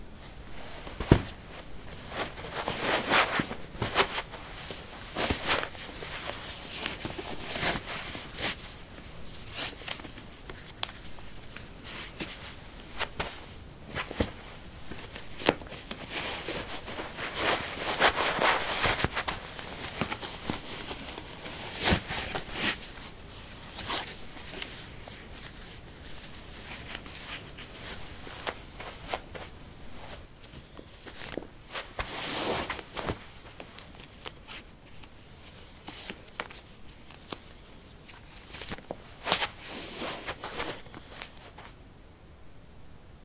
carpet 11d sneakers onoff
Getting sneakers on/off. Recorded with a ZOOM H2 in a basement of a house, normalized with Audacity.
footstep, footsteps, steps